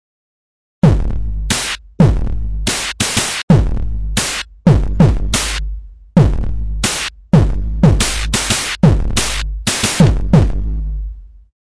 Hip hop drum loop made using Reaper DAW and Cerebrum Vsti.